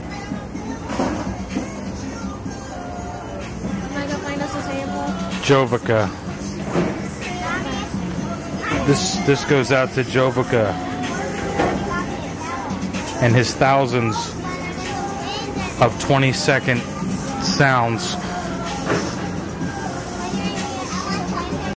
zoo jovicactribute
Walking through the Miami Metro Zoo with Olympus DS-40 and Sony ECMDS70P. Me thinking of how someone who used to upload hundreds of 1 second long soundsand how they make their multisamples 1 minute long now.
field-recording, animals, zoo